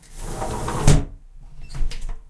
small window O
close, closes, closing, open, opening, opens, window